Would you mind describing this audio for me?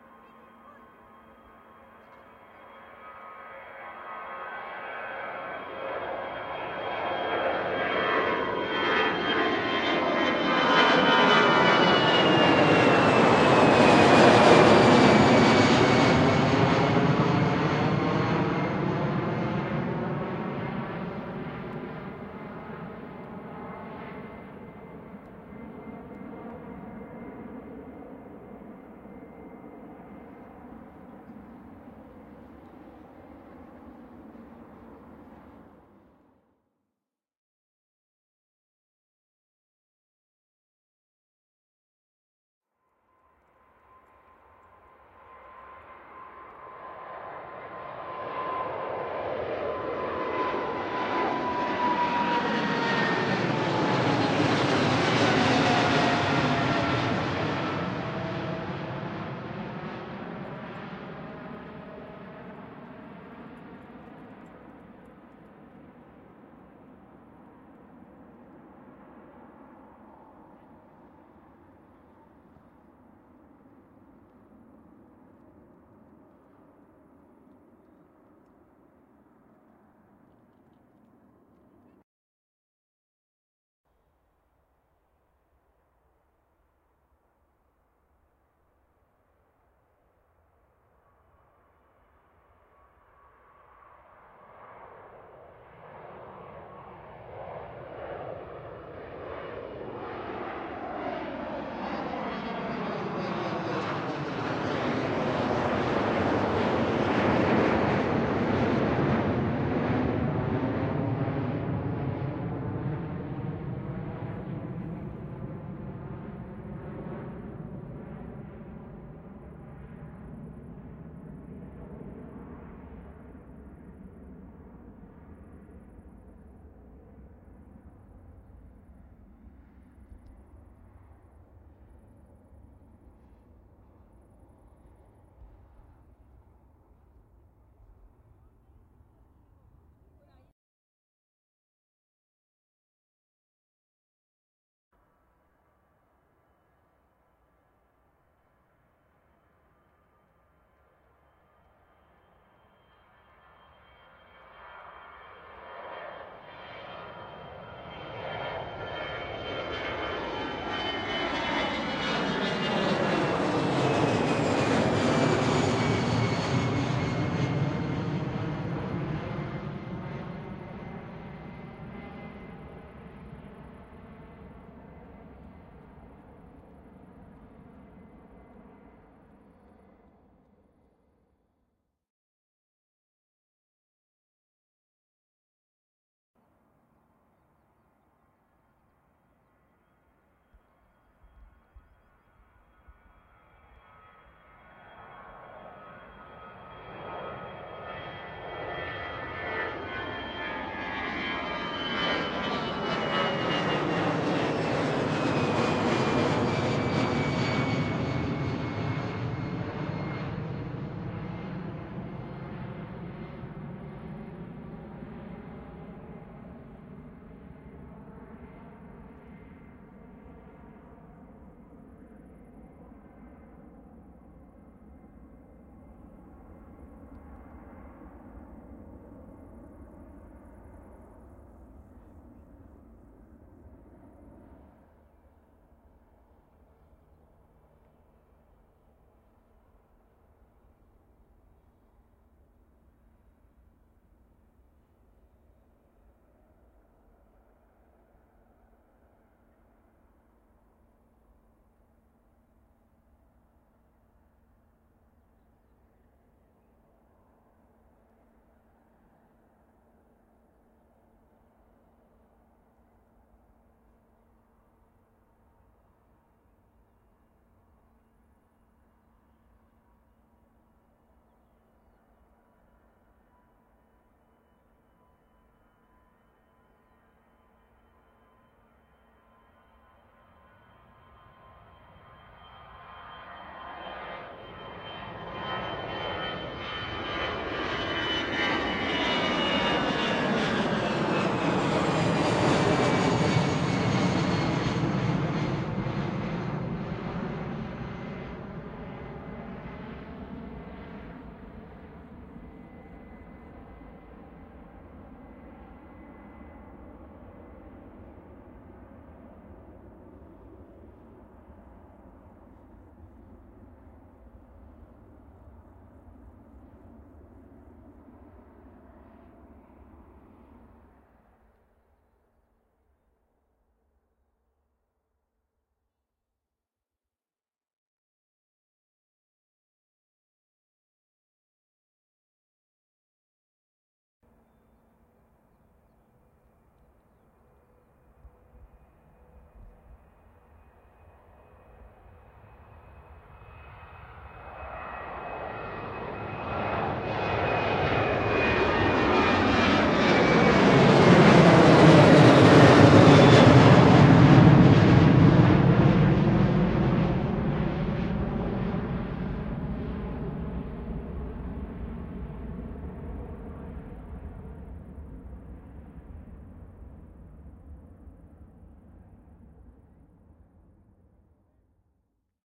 AIRPLANES TAKEOFF ZAVENTEM
Different takes off at the end of the runway 25L at Brussels Airport. Recorded with a Sennheiser MKH60 slightly mixed with a Rode NT4 on a Sound Devices 664.